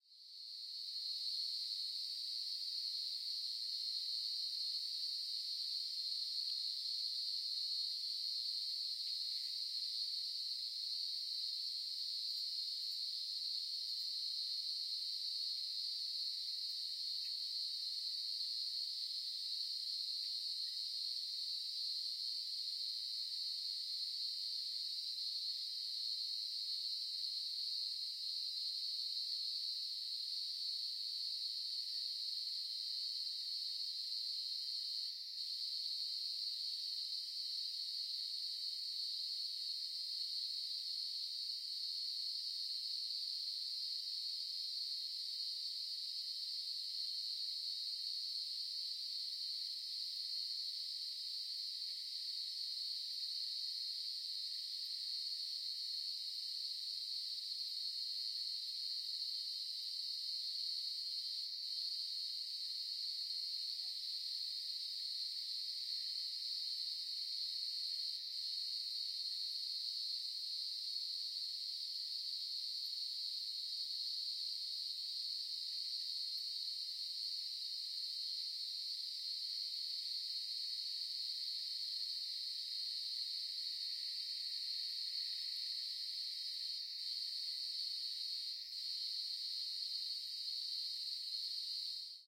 Cicadas in Melbourne
Cicadas recorded from my balcony in a Melbourne suburb at night. Cyclochila australasiae, the sound they make is around 4.3kHz. Recording was done with a Roland R-26 using built in omni mics, and noise was removed with Izotope plugins.
insects, night, nature, summer, field-recording, Cicadas, insect, atmosphere, cicada, ambience, atmos